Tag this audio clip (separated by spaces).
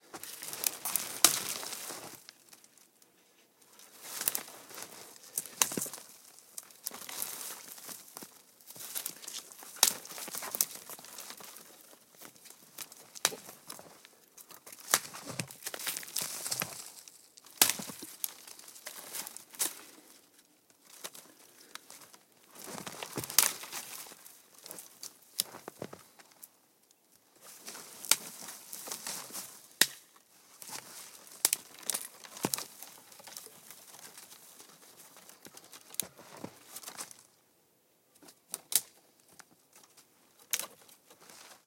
tree Ext wood-branch snap break field-recording foley